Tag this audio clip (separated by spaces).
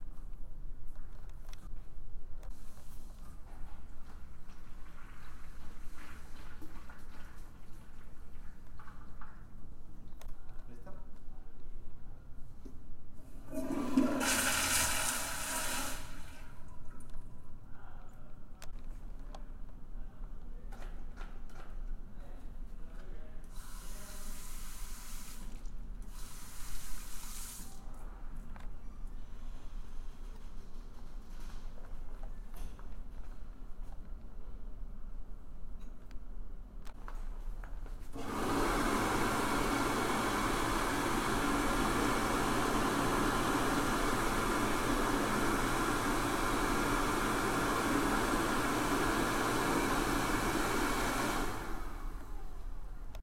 ambient background background-sound soundscape